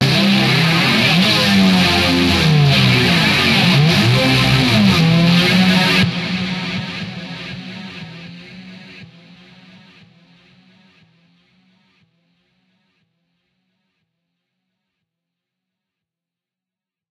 This is a nice lead Made by Cyclop with Virtual Amplifyers and some EQ.